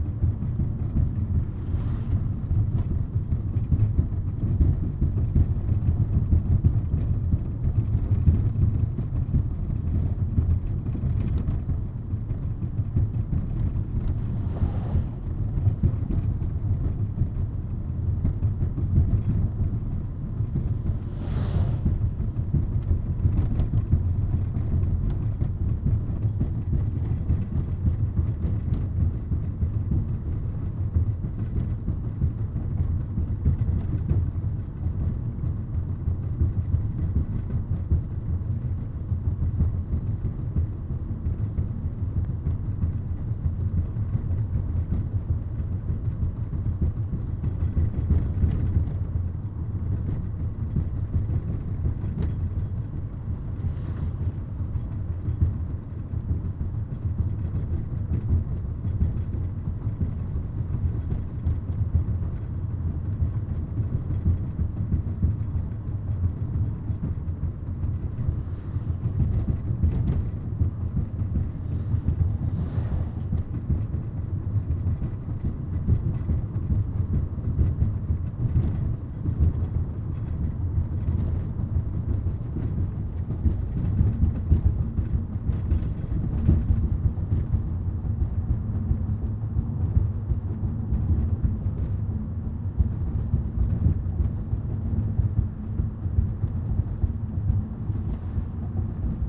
car on A panel road
Recorded inside a car when driving down the panel road. The road is covered by asphalt but the panelsstill do a noticeable turbulention. You can hear also other cars from the opened window. Recorded with Zoom H1.